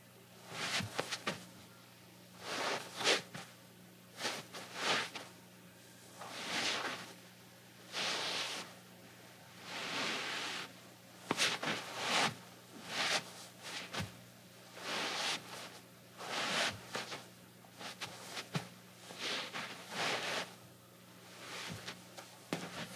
01-26 Footsteps, Rug, Slippers, Scuffs
Slippers on rug, scuffs